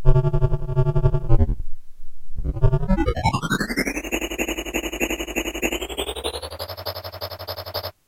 Series of industrial sounds from a virtual machine shop. Created in Granulab using real time adjustments of grain frequency, pitch and amplitude via midi and mouse. What is a malfunctioning pottery wheel doing in the machine shop, or is that a gyroscopic allusion?
synthesis, industrial, machine, texture, granular